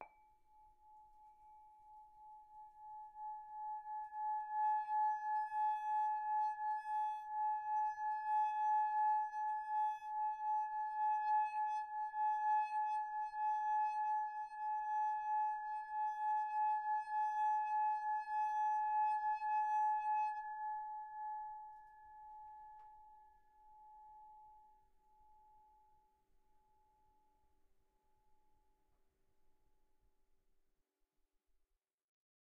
singing bowl 2
A small singing bowl
singing-bowl, small